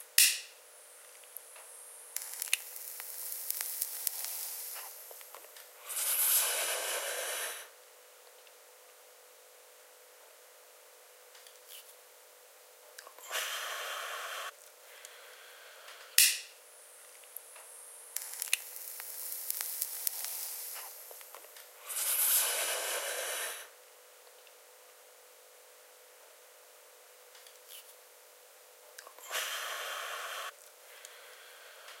Smoking herbs from glass pipe.
breathe, exhale, herbs, inhale, lighter, smoke, smoking